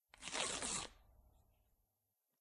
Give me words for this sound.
Slow Paper Ripping
Slow ripping sound of some paper.
tearing, rip, ripping, paper, tear, slow